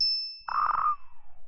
Bell sound with an electro-whip sound at the end.